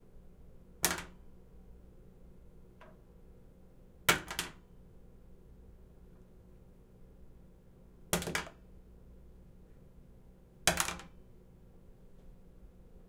Toothbrush counter
dropping a toothbrush at different distances onto a counter
counter, field-recording, toothbrush, hotel, H6